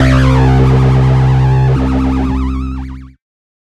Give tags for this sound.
110
808
bounce
bpm
club
effect
electro
electronic
glitch
glitch-hop
porn-core
processed
rave
sub
synthesizer
techno

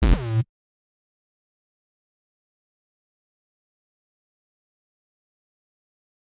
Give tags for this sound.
sound-design
electric
sound-effect
fx
lo-fi
digital

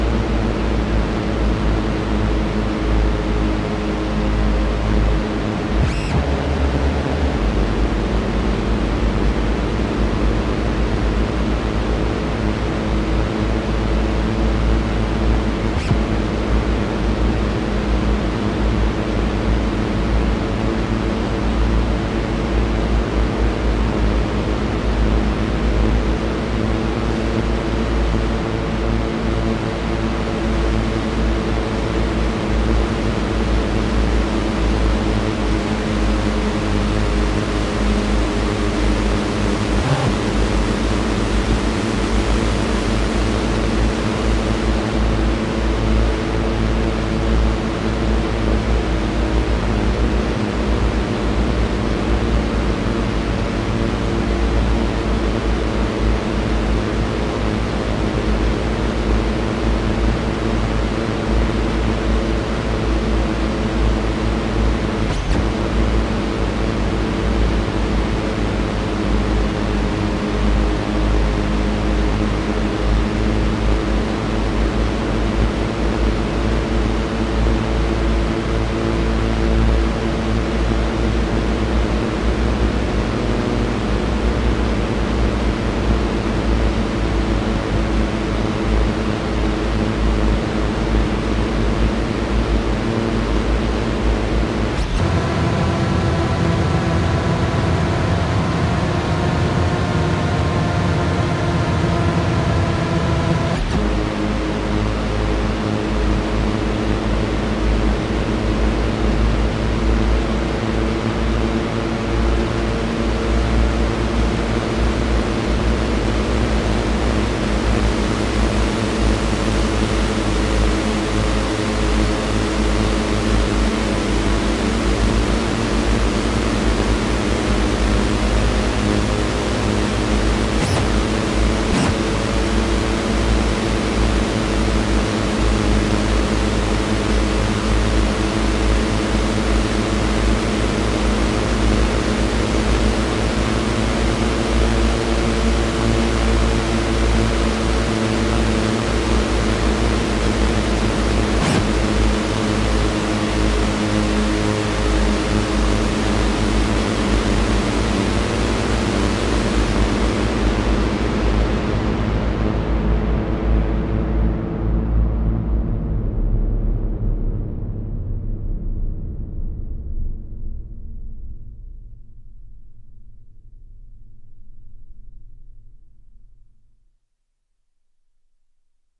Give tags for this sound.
Analog
Sequencer

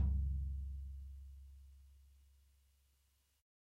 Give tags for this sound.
16
dirty
drum
drumset
kit
pack
punk
raw
real
realistic
set
tom
tonys

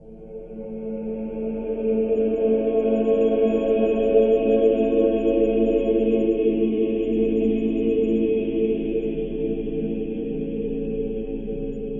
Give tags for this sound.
convolution
transition

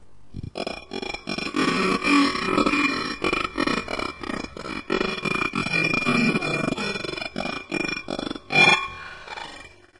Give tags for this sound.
baking-dish
glass
kitchen
percussion
pyrex

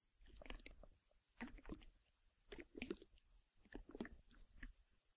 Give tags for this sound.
empty
human
hunger
hungry
stomach

